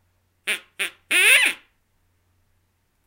Two short and one high quacks. Produced by Terry Ewell with the "Wacky QuackersTM" given for "Ride the DucksTM."
fake, quack, duck